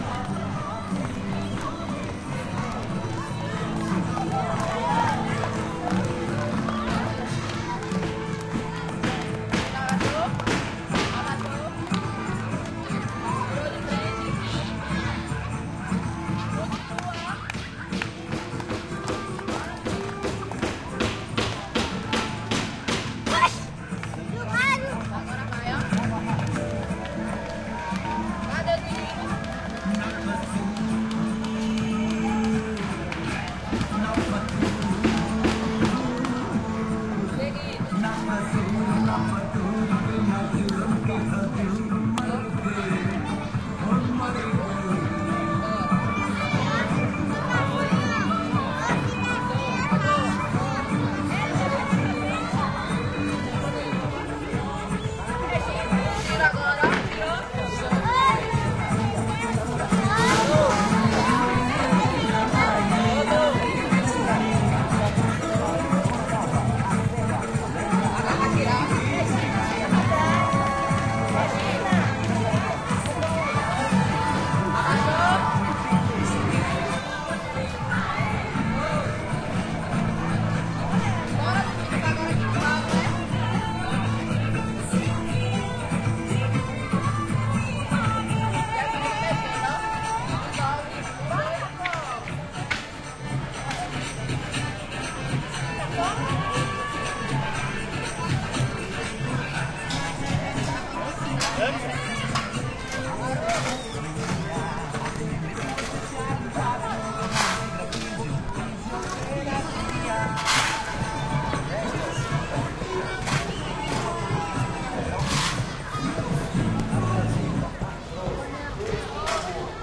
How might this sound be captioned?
Praça do Jardim Grande
Longitude: - 38.96527112
Latitude: - 12.60248032
Elevação: 8 m
Local: Jardim Grande
Bairro: Centro
Data: 10\06\16
Hora: 10:10
Descrição: Crianças dançando no jardim musica indiana
Gravador: Sony D50
Tags (palavras-chave): Cachoeira Jardim Grande Crianças dançando
Duração: 02:00
Autor: Gilmário e Wesley